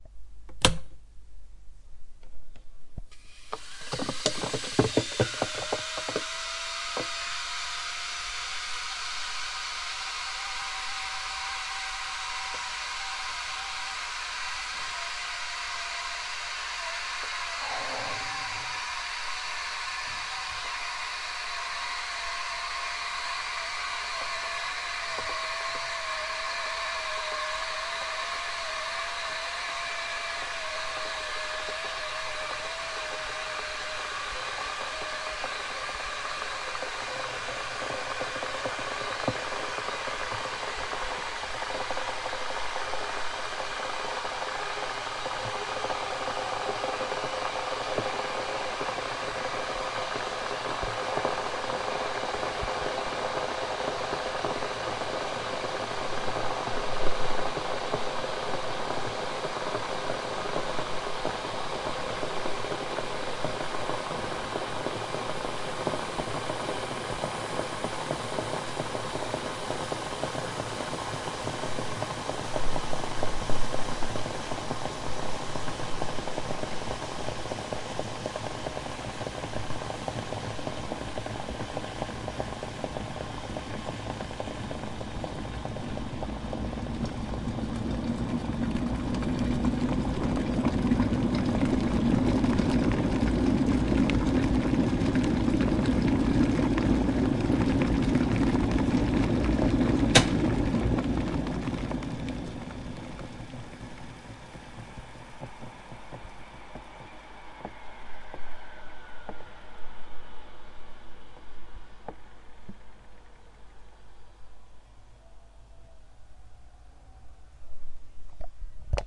Kettle Boiling
Close recording of a kettle being switched on, boiling and shutting itself off.
Recorded from about 20cm away from kettle.
Captured with Tascam DR-07mkII.